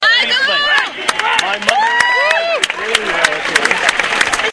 cheering claps at baseball game.
claps, cheering